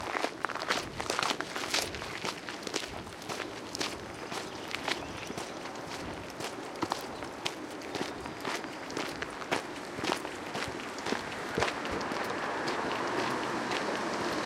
Walking2 gravel
A recording of a walk on a gravel path with passing traffic.